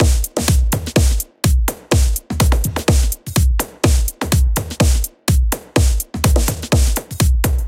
A simple 4 bar drum beat you can loop. Made in MAGIX Music Maker MX 2013.